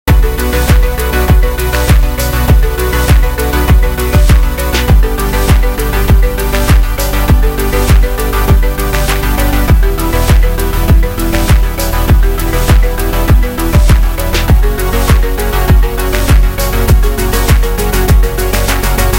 Pretty standard EDM. Created with the stock stuff in Logic Pro X as well as this drum Loop: